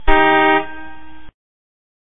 J1 Car Horn
a classic sound for a car's horn